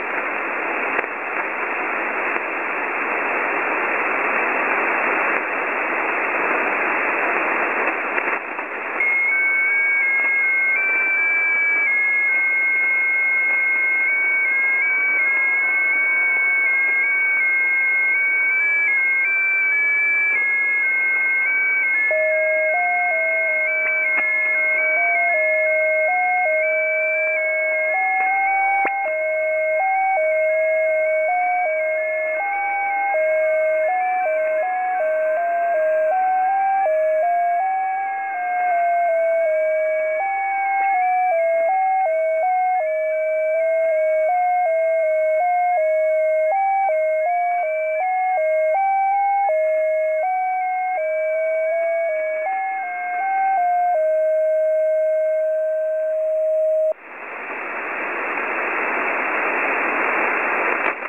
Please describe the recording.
JT65 transmission of message "CQ SQ9HHG JO90" recorded at 14076.00 kHz, upper sideband.